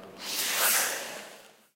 a miscellaneous whish-like noise
miscellaneous, whish